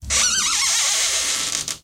Recording of the hinge of a door in the hallway that can do with some oil.